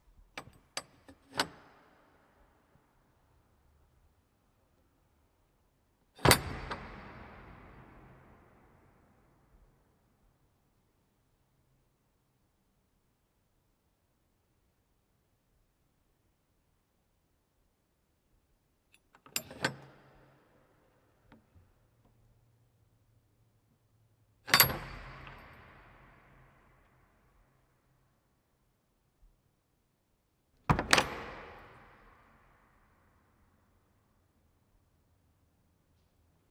Old door lock in the Noirlac Abbey, Bruere Allichamps, France. Lots of natural reverb, echo, delay and acoustics.
Acoustics, Delay, Echo, France, Lock, Noirlac-Abbey, Reverb, Thalamus-Lab